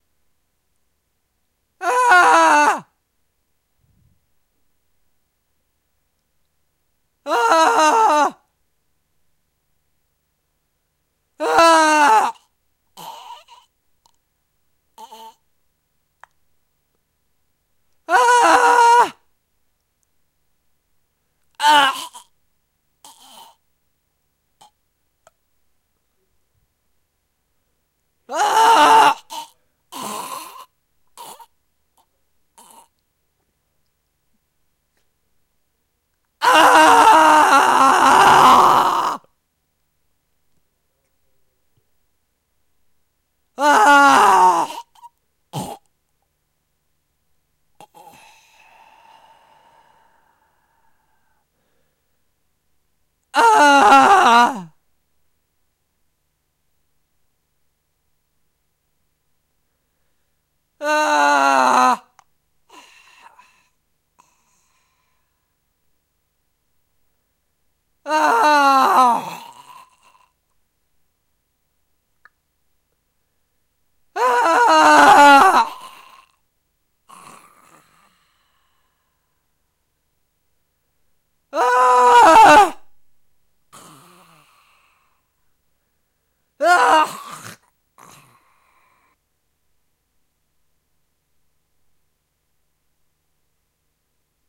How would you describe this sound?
scream and death
Some recording of my voice screaming of pain.
spash
horror, death, pain, murder, scream